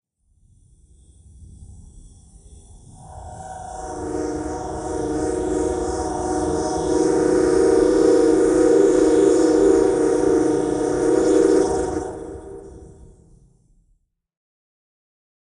Sci-Fi-X-02
Just a random Sci-fi effect.
Crazy, Effect, FX, Outer-Space, Paranormal, Sci-fi, Scifi, Strange, Unusual, Weird